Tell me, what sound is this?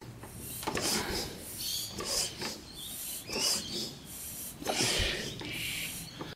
J8 pumping tire
pumping the tires of a bicycle in a garage
bicycle, garage, pumping